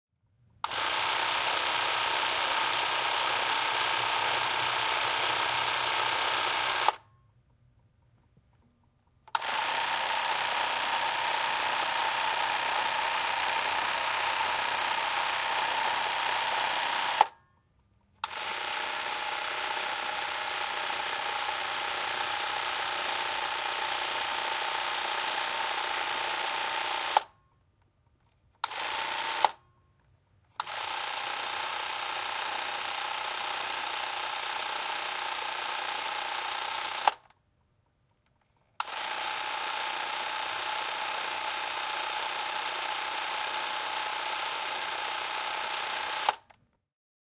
Kaitafilmikamera käy, pyörii / Film camera, home movie camera rolling, running, various takes, interior (Canon, 8 mm)
Kaitafilmikameran käyntiä, muutama versio. Sisä. (Canon, 8 mm).
Paikka/Place: Suomi / Finland / Lohja, Retlahti
Aika/Date: 03.09.1998
Yleisradio, Kamera, Kuvaus, Kaitafilmikamera, Run, Film-camera, Interior, Yle, Tehosteet, Soundfx, Field-Recording, Home-movie-camera, Camera, Shoot, Suomi, Kuvata, Finland, Cine-camera, Finnish-Broadcasting-Company, Roll, Shooting